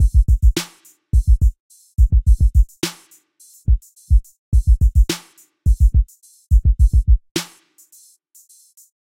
On Rd Bruce 2
Taken from a our On road Bruce project, made to go along with a slap base line. Mixed nicely
16-bar, hip-hop, kick, on-rd, On-Road, thumpy